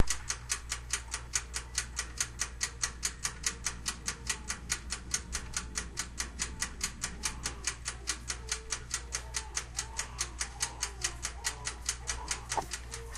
Classic (not so awful or annoying) sound of a toaster oven ticking like the classic intro to 60 Minutes.
minutes, tock, timer, clock, watch, time